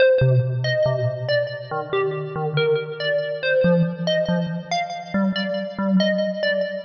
A softsynth riff i created with f.l studio 6.
techno, 140-bpm, trance, sequence, melody